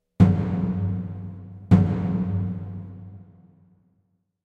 Davul(Greek ethnic instrument) Beat Recorded in Delta Studios. Double Beat.
Effect used: Arena Space designer. (Double Shot)